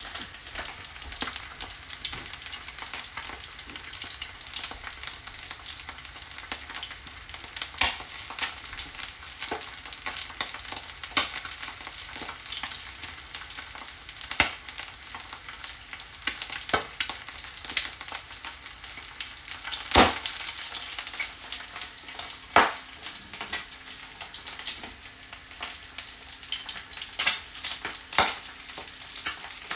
Fried egg
An egg being fried